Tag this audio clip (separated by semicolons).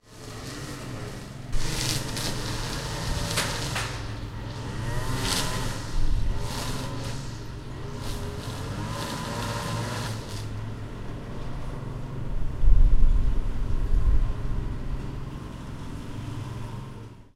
mowing; garden; grass; mower; shear